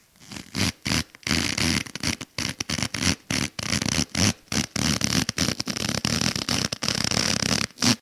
Creepy Shoe Sound